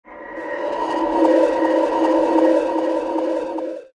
Sort of a weird UFO type sound mixed with a sonar type sound. Made with FM synthesis.